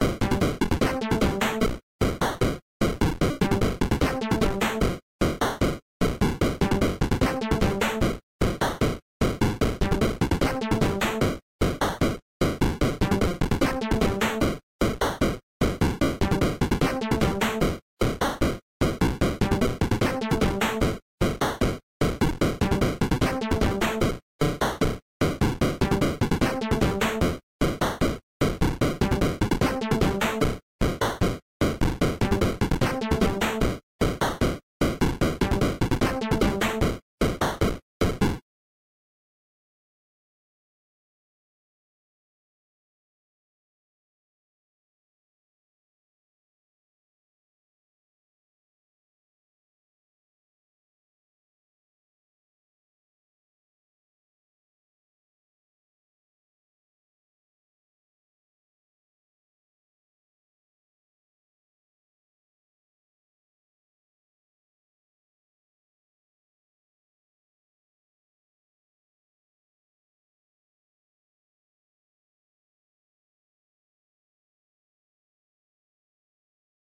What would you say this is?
Bitty Boss
A classic 8-bit music made using beepbox.
8-bit, robotic, electronic, chiptune, 8, music, boss, bit, game, computer, games, 1980s, 8bit, robot